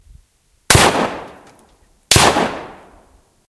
This is recorded at the target
223, field-recording, fire, gun, impact, report, rifle, target